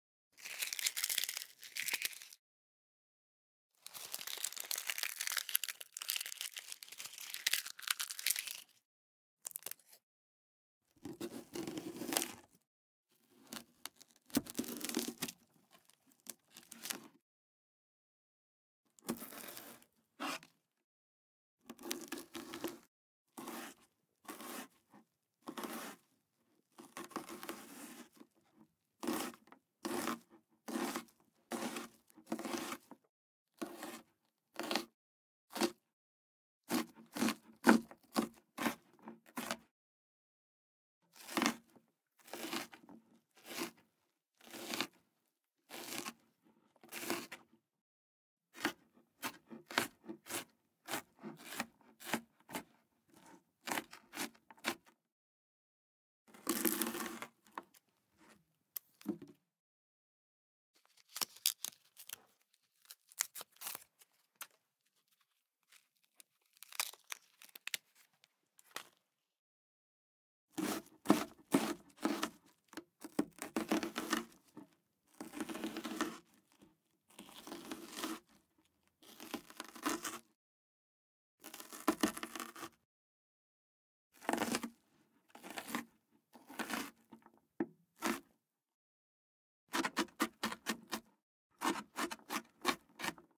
A variety of onion sounds, including slicing, peeling, and chopping. Recorded with a Sennheiser MKH60 microphone.
Peel, Sennheiser, Chop, Onion